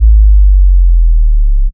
sub-bass-a1-c1
Simple beautiful sub bass, a little slide from A to C. 140 bpm, one bar in length.
A low frequency chirp generated in audacity starting at 55 and finishing at 32.7!
With the decibal set to 0. Go ahead an try loading this in and changing the decibal gain to 6. Then try changing it to -6, see how different it sounds. But you probably already knew that :)
down, bass, sub-bass, audacity, sine, tech, power-down, low, technology, sub, power, pitch-shift